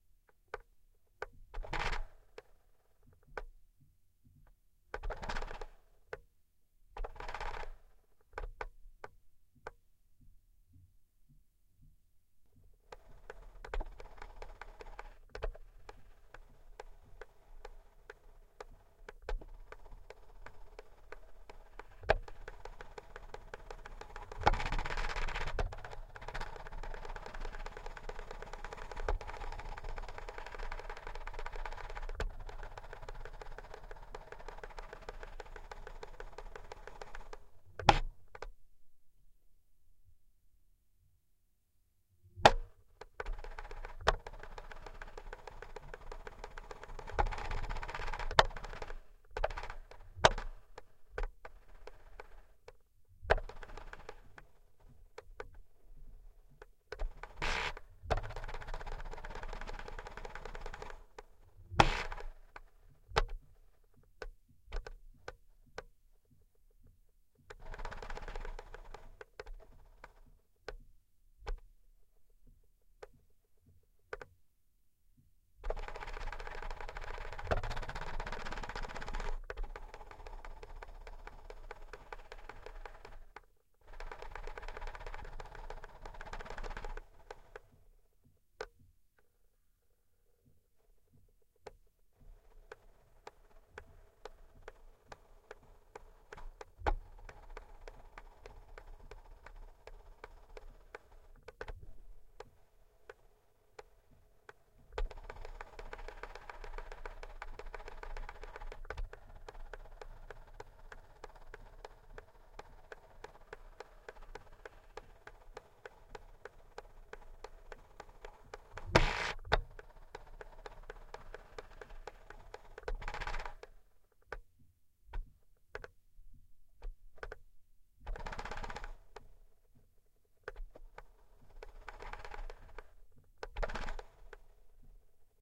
fader automation piezo
a recording of a motorized fader during playback.
2x piezo-> piezo preamp-> PCM M10.
fader, console, touch-sensitive, mixer, motorized, automation, piezo